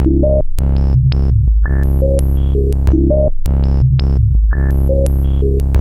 Sample and Hold + VCF and manual filter sweeps